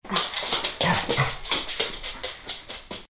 Dog walks on linoleum
Theres a dog walking on a linoleum, making noises